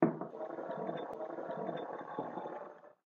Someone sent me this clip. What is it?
a cup being sliding in a table